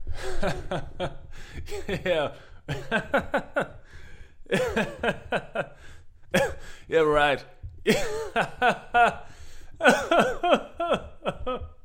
Male laughter
A 34 year old guy laughing over a joke